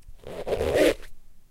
zoom H4.
pulling the yoga mat with my hand and letting it slip.
mat, rubber, rubbing, squeak, yoga